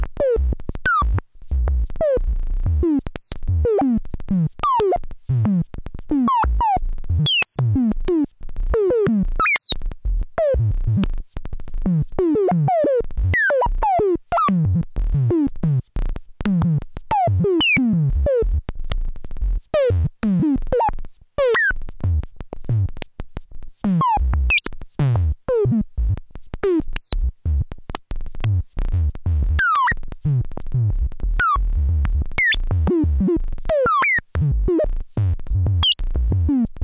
MS10-rhythmic toms clicks
Korg MS04 modulating a MS10.
MS04, analog, Korg, synthesizer